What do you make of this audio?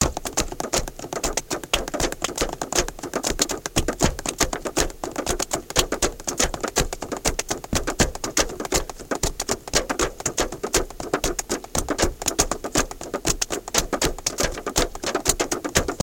ME2 Percussion
A collection of samples/loops intended for personal and commercial music production. All compositions where written and performed by Chris S. Bacon on Home Sick Recordings. Take things, shake things, make things.
acapella, acoustic-guitar, bass, beat, drum-beat, drums, Folk, free, guitar, harmony, indie, Indie-folk, loop, looping, loops, melody, original-music, percussion, piano, rock, samples, sounds, synth, whistle